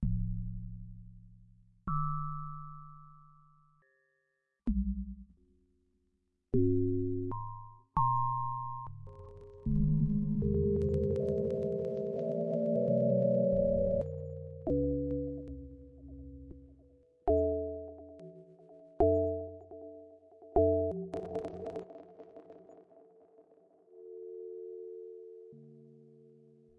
bass space 002 bleeppad
bleeps and bloops made with reaktor and ableton live, many variatons, to be used in motion pictures or deep experimental music.
bass, bleeps, dub, experimental, pad, reaktor, sounddesign, space